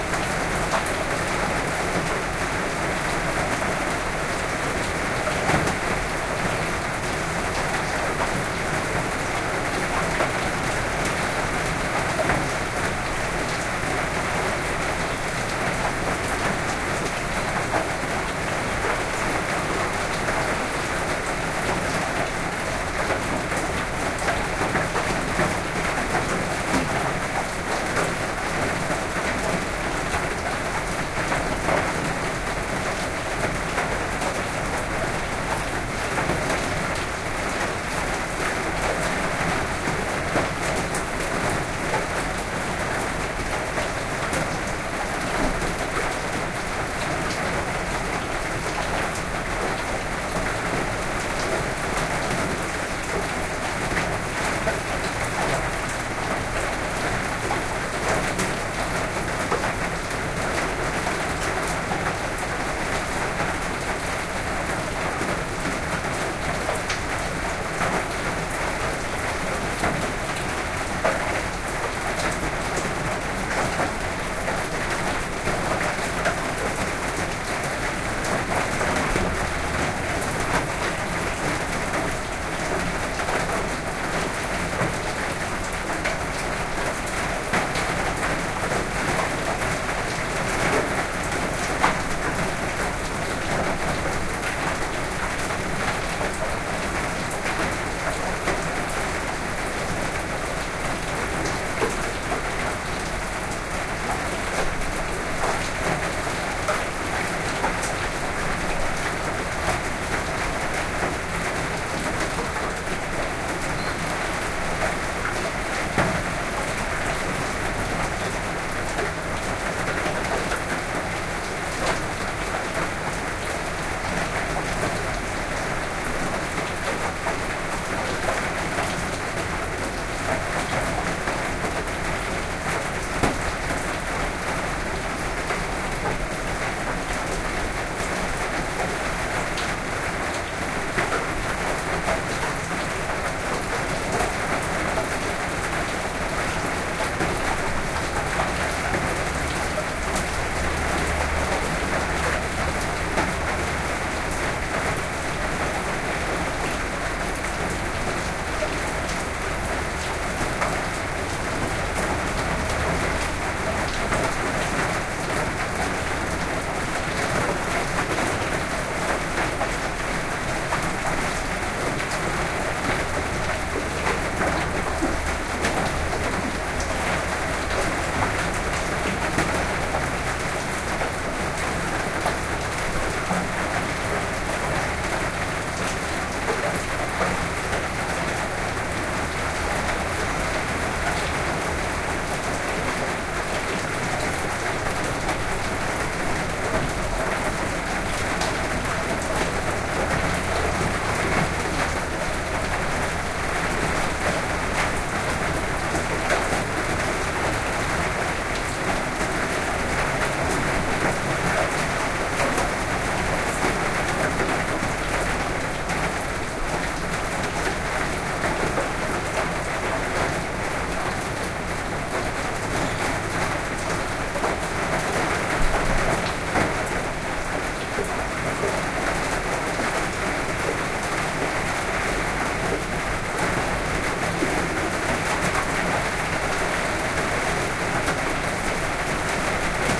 Rain on tin shed roof
drip, heavy, roof, shed, tin, water